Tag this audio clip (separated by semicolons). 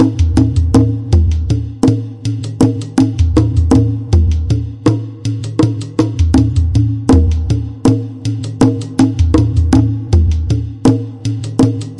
beat; drum; drum-loop; groovy; improvised; loop; percs; percussion-loop; rhythm; sticks